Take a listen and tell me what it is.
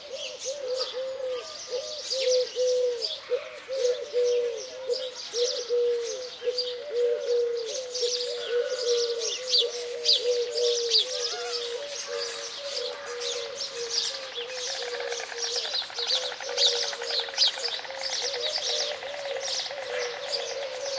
Collared Doves cooing, Common Sparrow chirps, White Stork clap their bills. Sennheiser ME66 + MKH30 into Shure FP24, recorded in Edirol R09 and decoded to mid-side with Voxengo VST free plugin